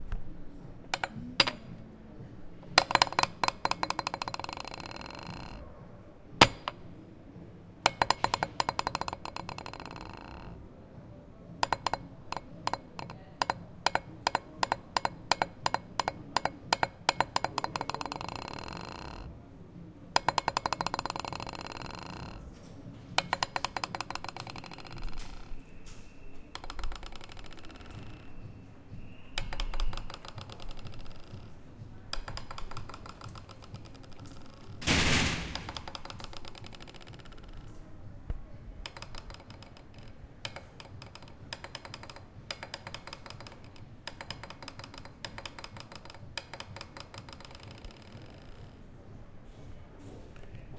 And what about this other kitchen add?
I stood over 5 min repeating this stupid movement with the coffee dish. Funny too!